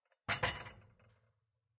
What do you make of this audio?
Moving plates.
{"fr":"Assiettes 4","desc":"Bouger des assiettes.","tags":"assiette couvert cuisine"}
plate, dishes